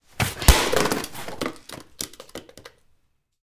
flashlight big chunky plastic emergency light fall on gritty concrete cellar floor and bounce and rock close nice impact
rock, plastic, flashlight, fall, impact, cellar, close, concrete, gritty, chunky, bounce, floor, emergency, light, big